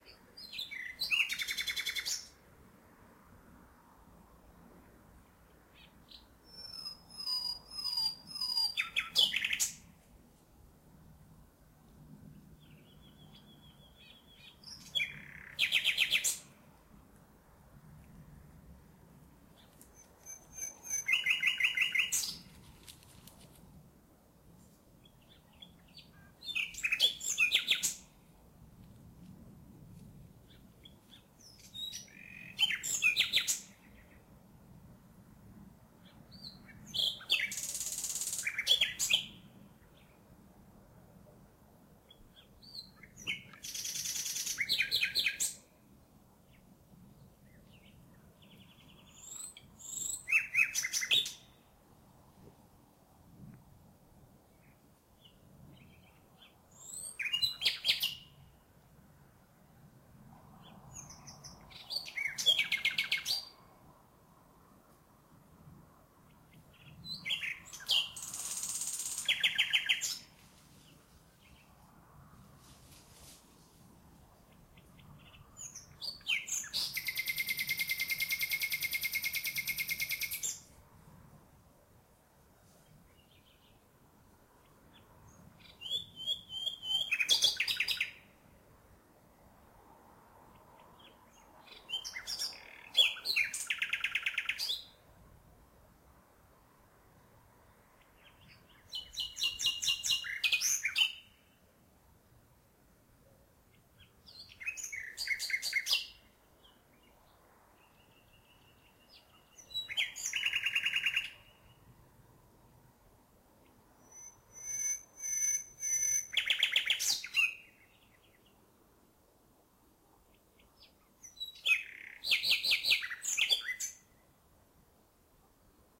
Usignolo - Nightingale
A nightingale in the north of Italy at about 10 p.m.
bird, nightingale, birds, usignolo, spring, field-recording, night, nature, birdsong, Italy